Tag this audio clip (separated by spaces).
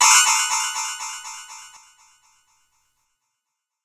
scifi science